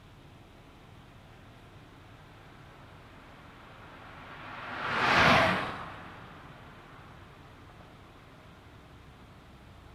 One car speeding by me